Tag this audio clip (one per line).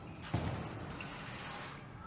alive
midi
recording
sampling